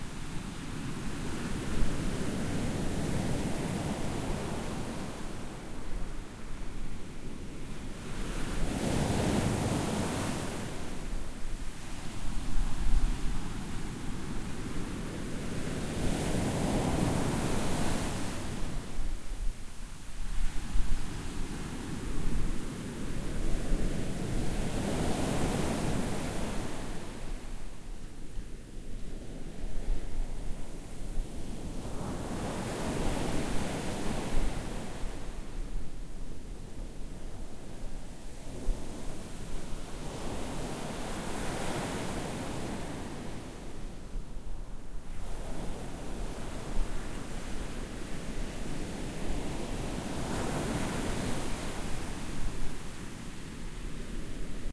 ocean waves 3
ocean waves recorded in a windy day on the northern portuguese atlantic shore